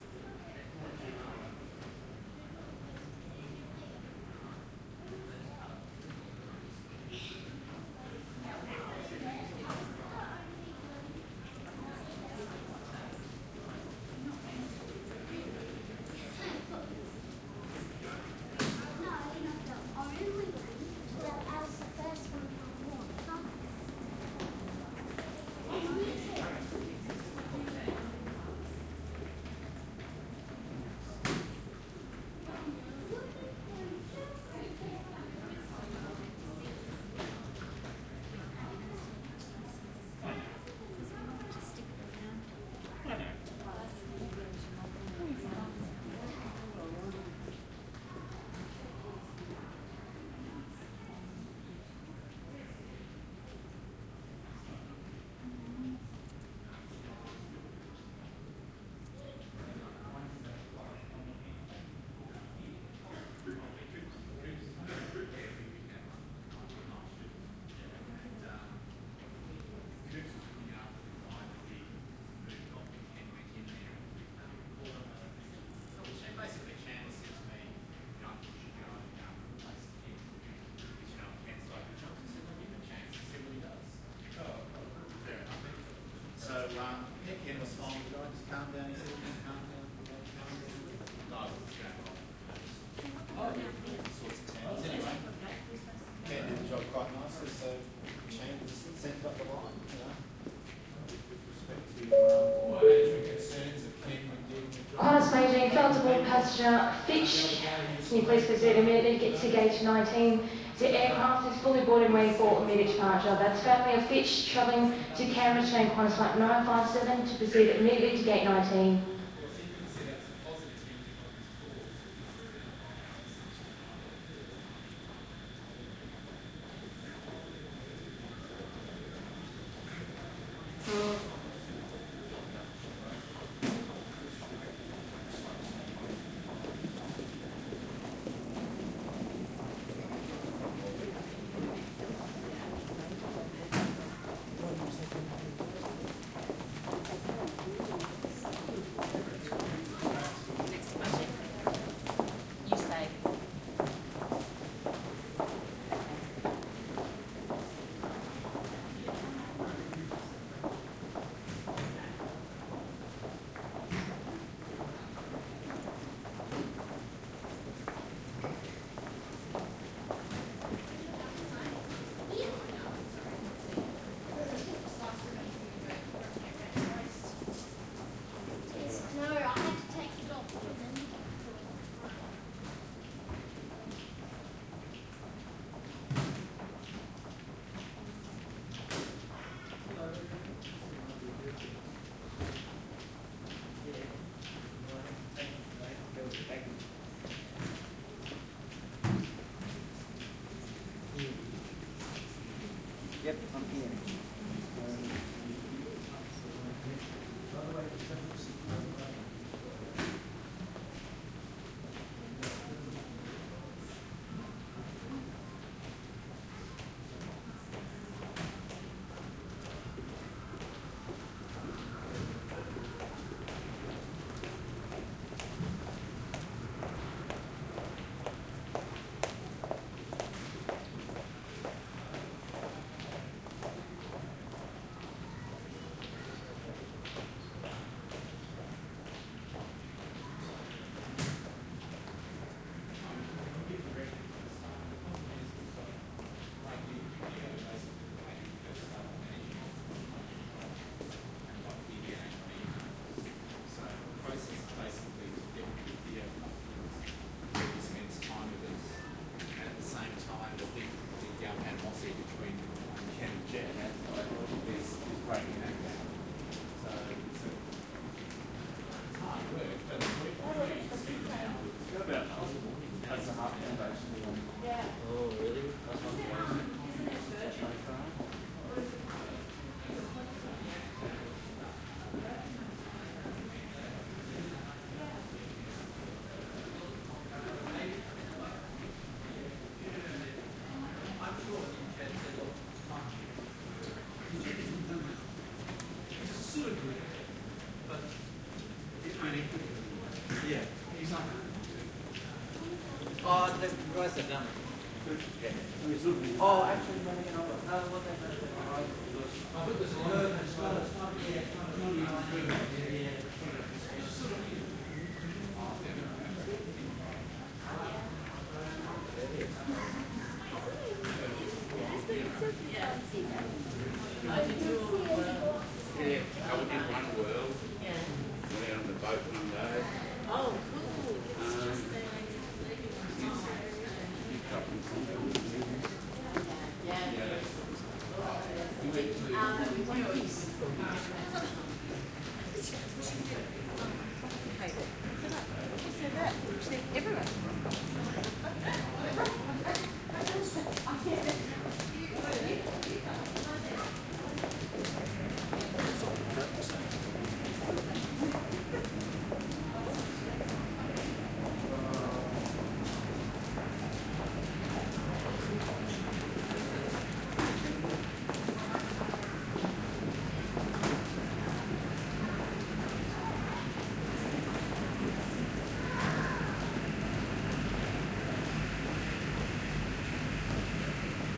Airport Passage Brisbane 5
Ambient sounds of people passing on a hard floor in a long passage between a terminal and the main airport lobby. Recording chain: Panasonic WM61-A microphones - Edirol R09HR